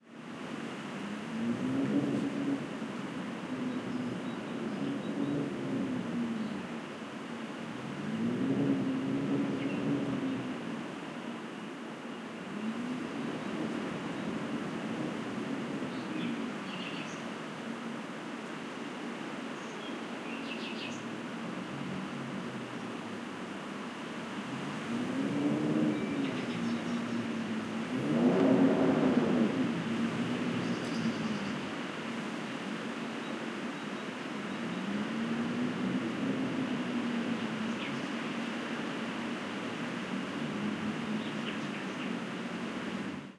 20160416 howling.wind.03
Weird mix: wind howling ominously at door, cheery bird tweets in background. Audiotechnica BP4025, Shure FP24 preamp, PCM-M10 recorder. Recorded near La Macera (Valencia de Alcantara, Caceres, Spain)
ambiance, dark, dreary, field-recording, gusty, howling, nature, sinister, storm, wind